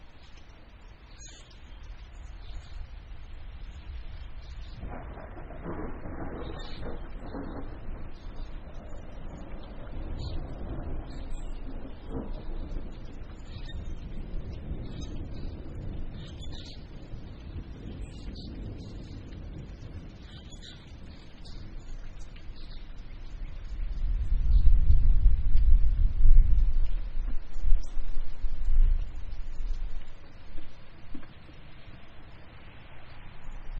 And its still a pleasant May evening.